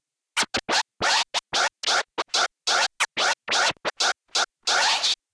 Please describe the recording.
Scratch Fresh 14 - 2 bar - 90 BPM (no swing)
Acid-sized sample of a scratch made by me. Ready for drag'n'drop music production software.
I recommend you that, if you are going to use it in a track with a different BPM, you change the speed of this sample (like modifying the pitch in a turntable), not just the duration keeping the tone.
Turntable: Vestax PDX-2000MKII Pro
Mixer: Stanton SA.3
Digital system: Rane SL1 (Serato Scratch Live)
Sound card on the PC: M-Audio Audiophile 2496 (sound recorded via analog RCA input)
Recording software: Audacity
Edition software: MAGIX Music Maker 5 / Adobe Audition CS6 (maybe not used)
Scratch sound from a free-royalty scratch sound pack (with lots of classic hip-hop sounds).
acid-sized, 90, scratch, turntable, dj, s, golden-era, vinyl, rap, hip-hop, hiphop, classic, scratching, turntablism